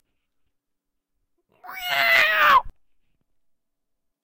cat screech
cat, exclamation, scream, screech